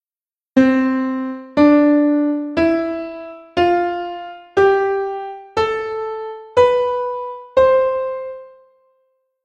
C4 Major Scale Piano
C 4 Major Scale on Piano @ 60 BPM